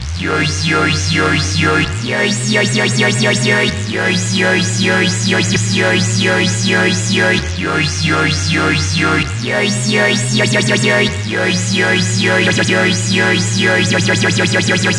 talking chords
Chord loop made using massive. I think i recorded it at 130 bpm but can't remember. Would probably suit a dub step track pretty well.
130, chord, step, bpm, loop, dub, wobble